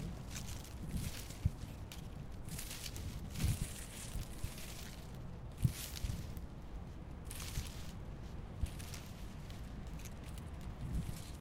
Viento Flojo Hojas
Sound generated by the appearance of the air in outside which causes the movement of the leafs. Moderate intensity level.
wind, scl-upf13, leaves, smooth